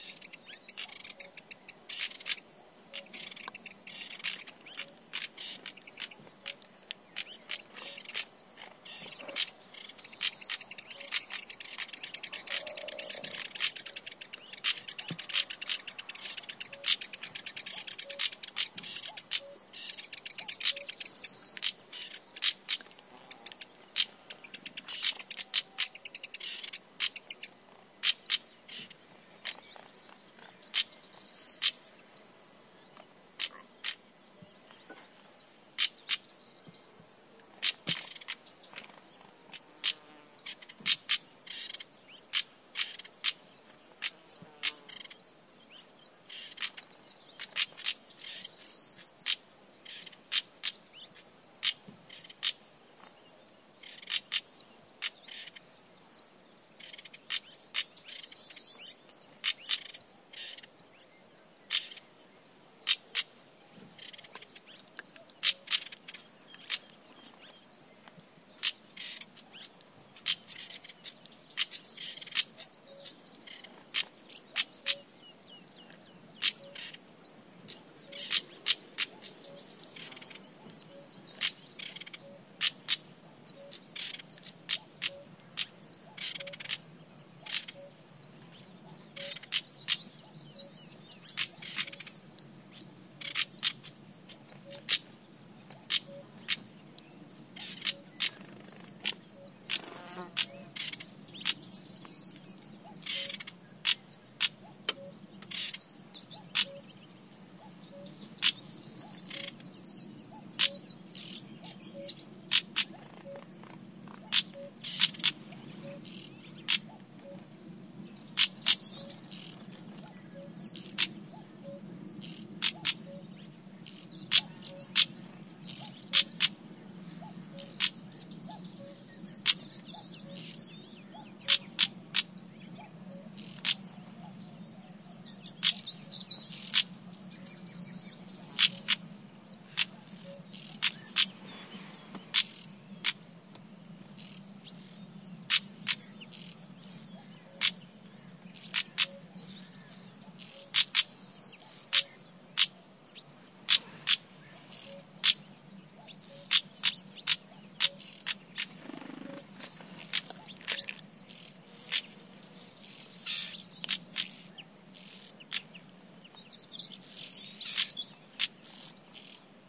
Bird (Stonechat, Corn Bunting, Cuckoo) callings, spring ambiance. Recorded near Castelo de Vide (Alentejo, Portugal), using Audiotechnica BP4025, Shure FP24 preamp, PCM-M10 recorder.